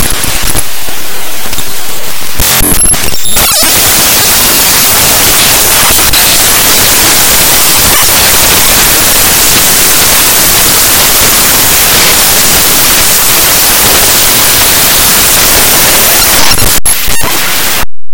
Raw Data 7
Various computer programs, images and dll/exe files opened as Raw Data in Audacity.
raw, raw-data, static, computer, electronic, sound-experiment, audacity, data, noise, annoying, glitch, processed